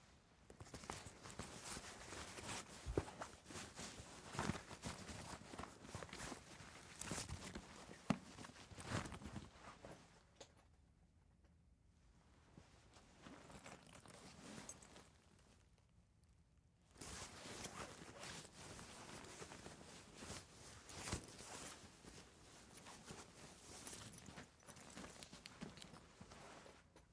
Backpack Rummaging
Rummaging through a backpack
backpack, rummaging, search, foley, rummage